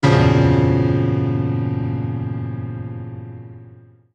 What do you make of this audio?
I made this because I am a huge fan of horror in general. It's free for everyone even if you are a billion dollar company. I only ask for some credit for my work but then again I can't stop you from not doing so :-)
Thank you and have fun!
Ambiance, Creepy, Halloween, Horror, Loop, Piano, Scary, Thriller